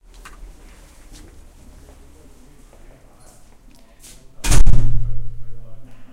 A door opening and closing.